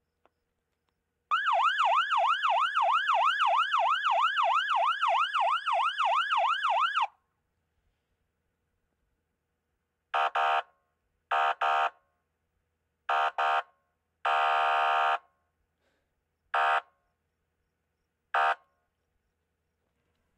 AMB Siren Police Misc Stationary 001
Various police sirens and sounds. Stationary.
Recorded with: Fostex FR2Le, BP4025
ambulance, emergency, police, siren